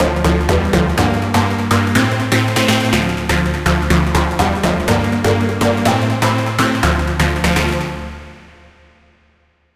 sydance3 123bpm
dance dj music sound pattern part sample stabs beat pbm mix disco club move trance dancing loop instrumental stereo interlude intro chord jingle background radio drop broadcast podcast trailer techno